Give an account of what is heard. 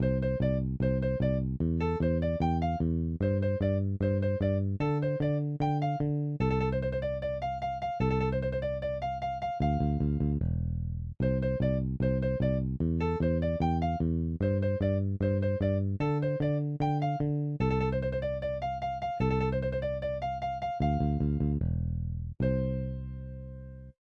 Simple upbeat song that uses a bass and a piano.